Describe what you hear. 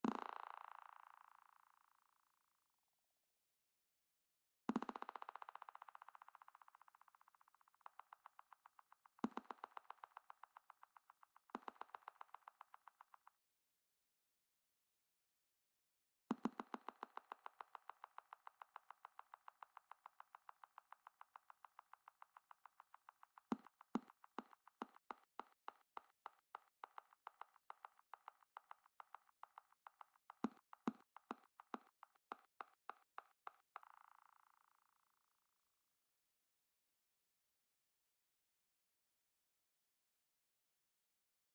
rhythmical lipsmacking Stereo'd and sweeped
lips, smack